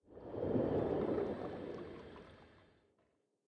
Fulfilling a user request for "underwater swishes and swooshes".
I initially planed to use some of my own recordings and even recorded some sounds for this purpose.
revealed much better sounds that could be processed and blended together to achive the desired effect. The mixing was done in
Ableton Live 8, using smoe of the built in effects (like EQ and reverb).
The sounds used are listed below.
Thanks to the original creators/recorders of the sounds I have used.